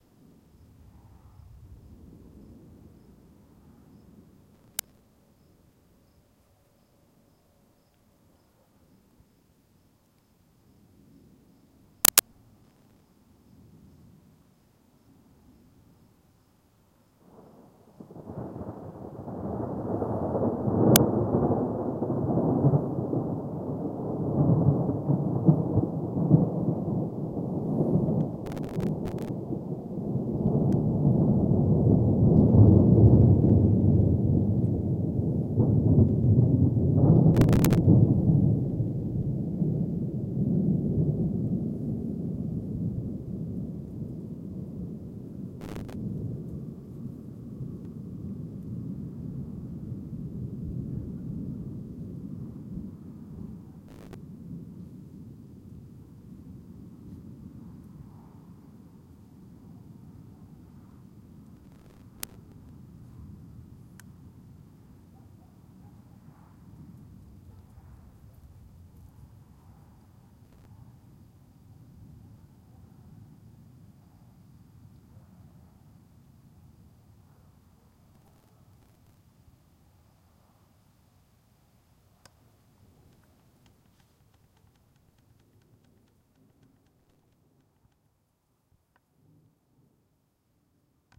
recorded outside my house with Tascom DR-07mkII